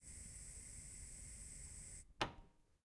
08-Middle Flame TurnOff
CZ, Czech, Pansk, Panska